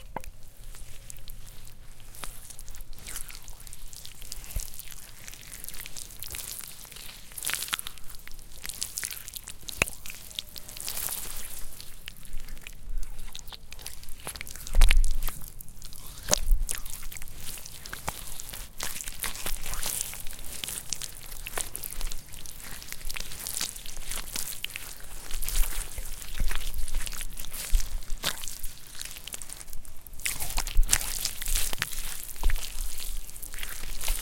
mud squish take 2
I recorded my hands sloshing around in wet mud at a very close range. The sound could work for any variety of wet, squishy noises. Created for a personal video project but I thought I would share. Recorded on a ZOOM mic at 4800 Hz.
dirt, dirty, hands, muck, mud, nature, organic, outdoor, slimy, slog, slug, squash, squirt, squish, wet, woods